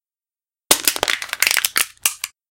Crushed Can
Crushing a can. Noise Reduction used. Recorded at home by crushing a soda can on Conexant Smart Audio with AT2020 USB mic, processed by Audacity.
aluminum-can, beer, beer-can, compact, crunch, crush, crushed, Crushed-can, metal, pop, soda, trash